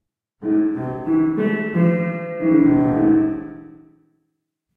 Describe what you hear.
Music Classic Horror Tune vers.2
A music effect I made on my piano. The 101 Sound FX Collection.
horror, piano, jingle, music, tune, bells